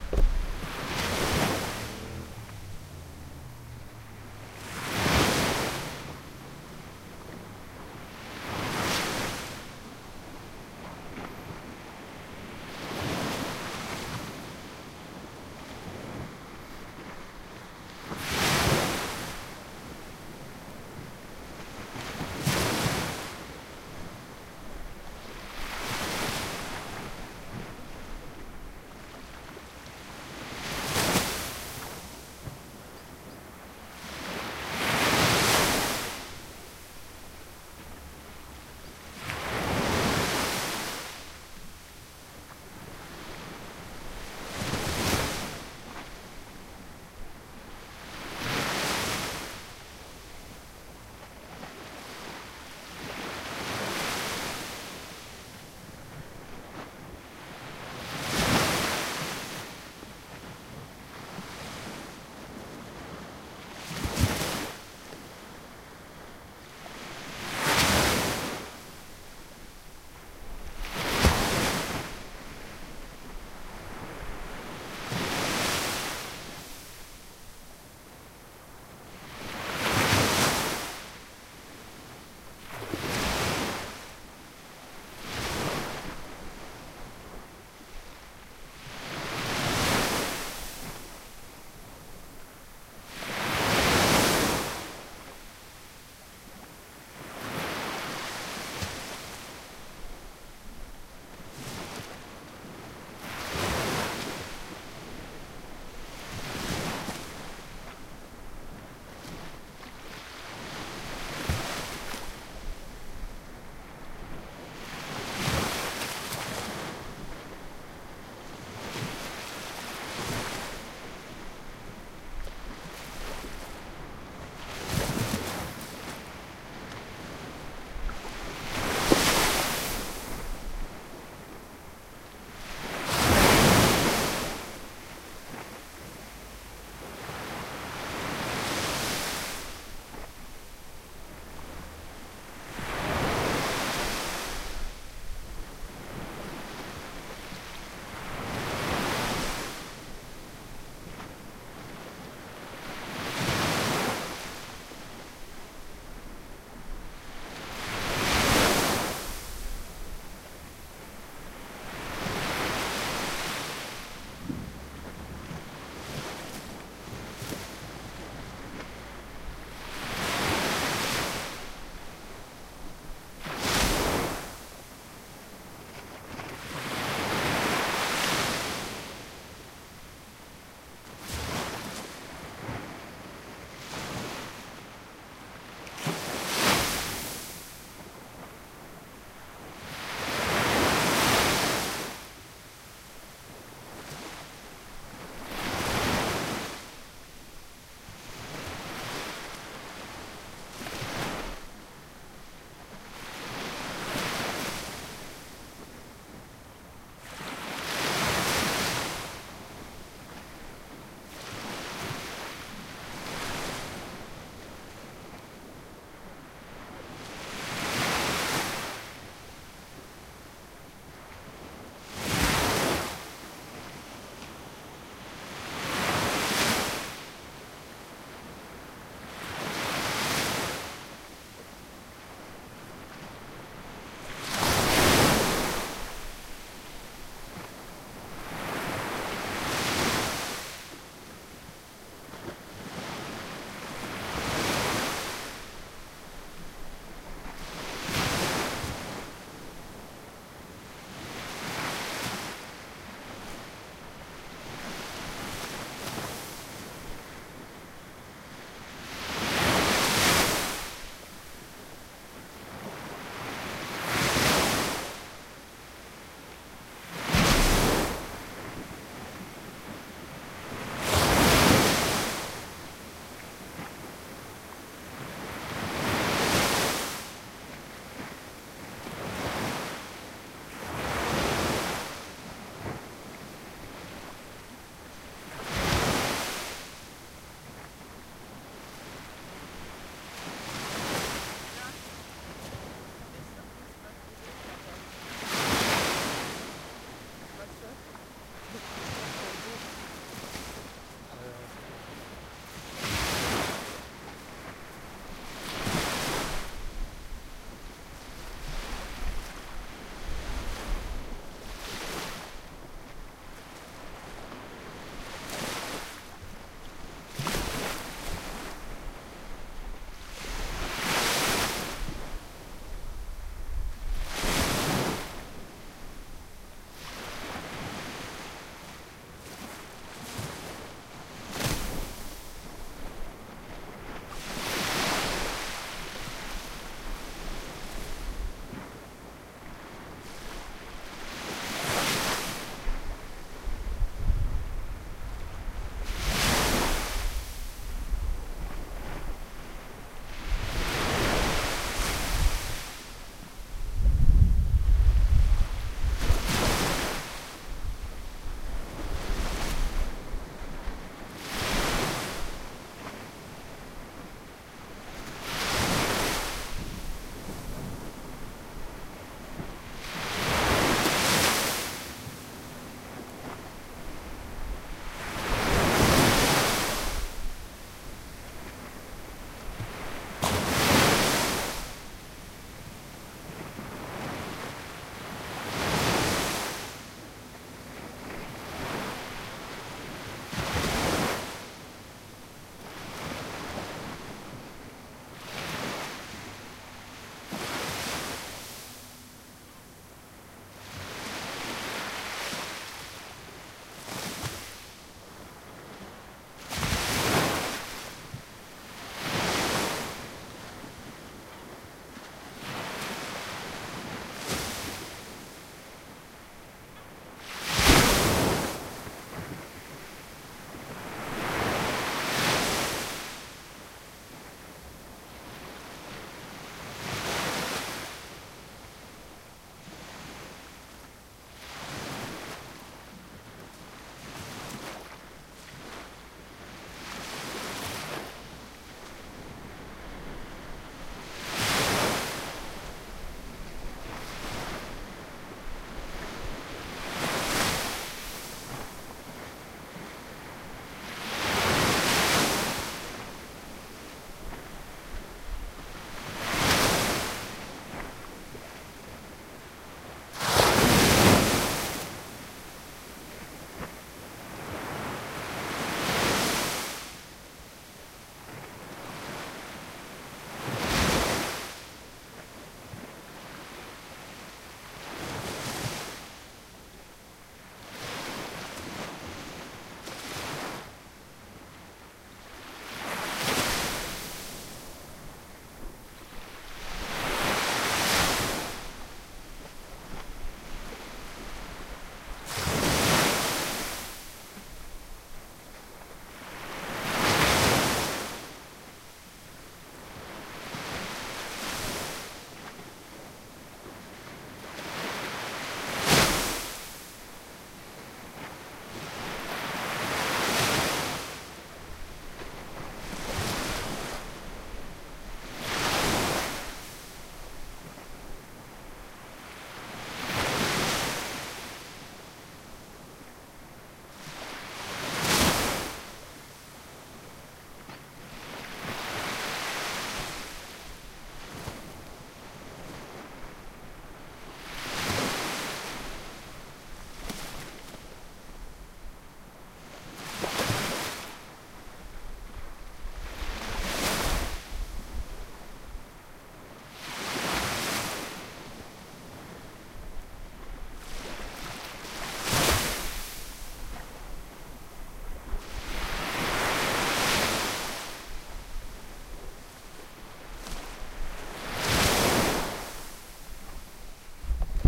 onades matí
early recording (7 am) of a lonely beach
beach, field-recording, ocean, sea, shore, water, wave, waves